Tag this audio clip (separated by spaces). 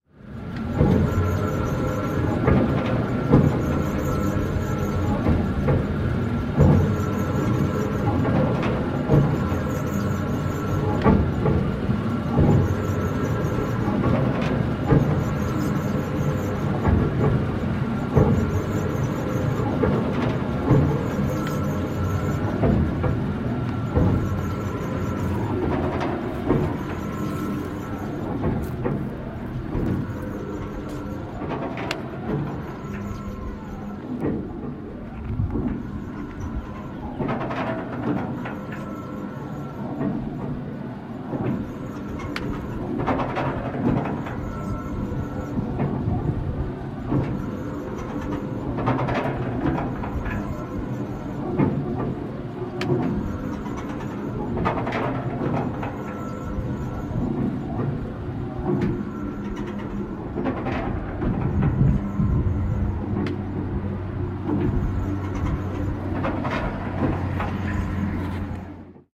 motor factory rumble industrial oil-pump machine heavy machinery derrick oil-rig